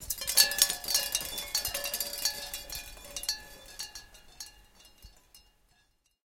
wind chimes 01
This is recorded from wind chimes, it`s a almost 2 meter long string with small, different sized, plastic like bowls on it. I recorded it hung up on the wall, because i needed it to sound more percussive.
atmosphere, soundeffect, effect, noise, fx, windchimes, recording, stereo, wind, ambience, chimes